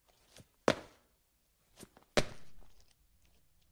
Sneakers on tile, jumping